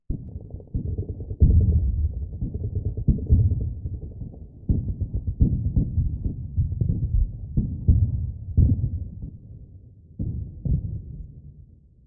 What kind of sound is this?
Artillery (distance)
This a mix of field recordings edited with Gold Wave recorded with a verity of recording devices such as TASCAM DR-40 and others. This is just a bunch of previous sound used off of projects that we had in the past so I mixed them and thought I would share them. This was recorded at 500Hz – 2000Hz that way it would only record the bass and cut out the environmental sounds. THIS HAS BEEN EDITED AND MIXED WITH OTHER SOUNDS.
artillery, War, 500Hz, gun, Ontos, M50, loopable, distance, Leichtgeschtz, field-recording, 42